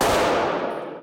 Lo Fi 12-bit Nova Gun Rounds Gunshots Shooter Shells Rifle Old Converter - Nova Sound
5, 8-bit, Guns, Sound, Shooter, bits, Nova, Cyber, Dark